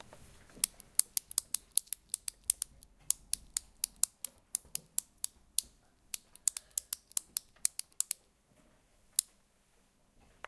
mysound Regenboog Abdillah
Sounds from objects that are beloved to the participant pupils at the Regenboog school, Sint-Jans-Molenbeek in Brussels, Belgium. The source of the sounds has to be guessed.
Belgium, Brussels, Jans, Molenbeek, mySound, Regenboog, Sint